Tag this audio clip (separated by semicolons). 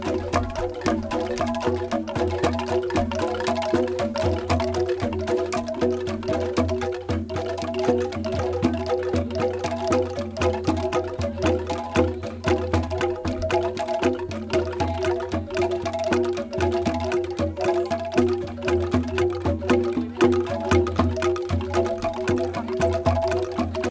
angklung; ciptagelar; field-recording; harvest; jawa-barat; rural; sunda